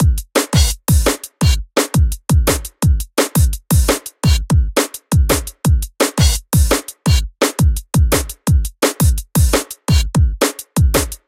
08 drumloop dnb
Drum And Bass drum loop extracted from an Ableton project that I chose to discontinue. No fancy effects, just a simple drum pattern with some elements.
drum-n-bass, dnb, drum, drum-loop